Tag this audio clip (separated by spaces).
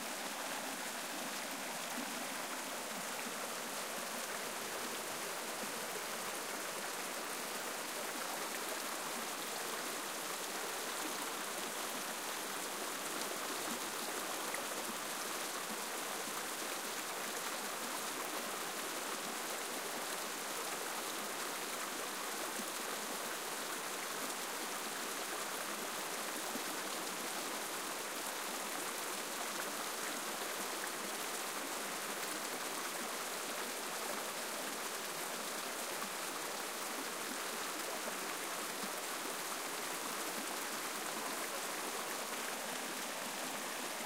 babbling brook creek flowing gurgle stream water